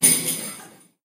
Jingle bells. Recorded with an iPhone. Kinda distant. This was for Christmas.
bell, bells, jingle, ring